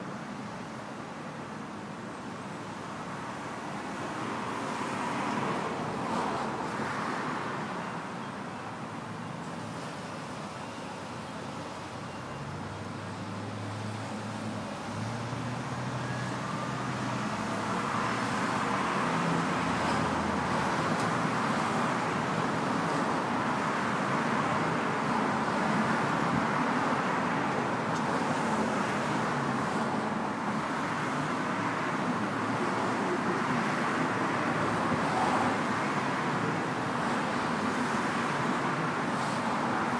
Busy passing cars in a windy urban environment.
Recorded with iPhone 4S bottom microphone.
noise, street, urban, fast, city, vehicles, ambient, busy, windy, ambience, passing, ambiance, cars, wind